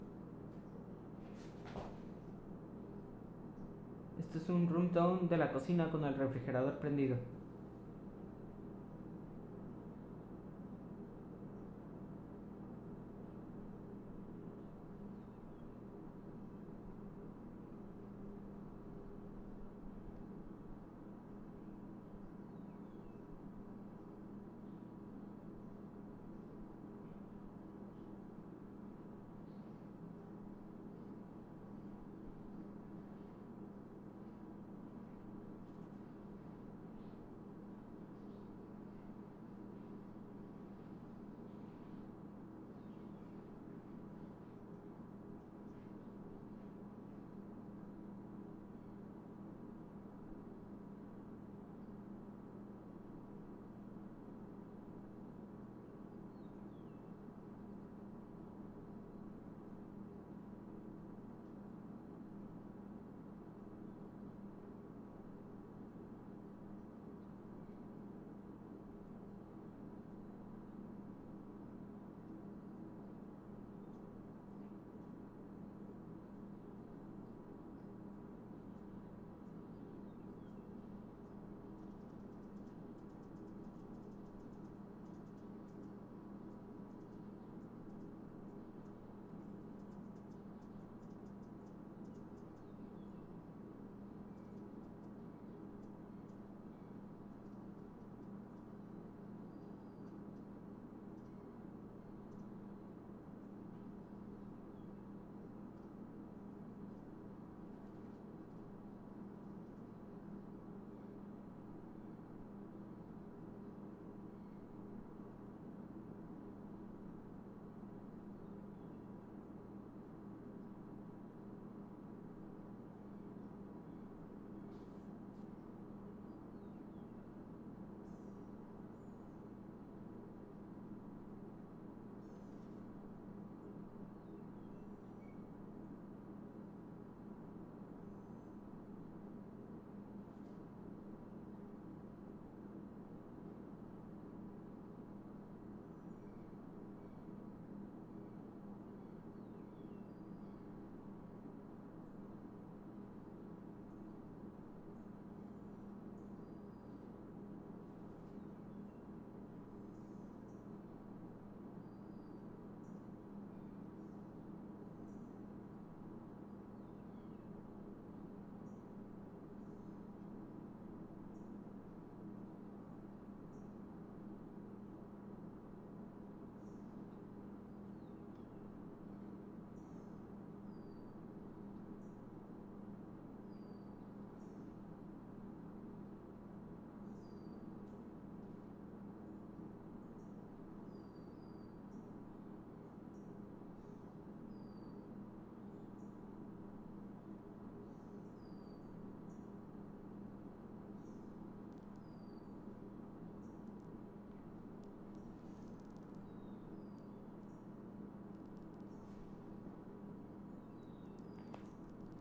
ROOM TONE KITCHEN
Room tone of a small kitchen with refrigerator running.
Sound Devices 744T
Sanken CS3 E